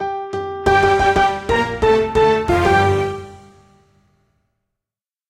Mini News Jingle
Short. Intense. It's news time.
breaking-news, intro, news, short, headline, announcement, tv, mini, jingle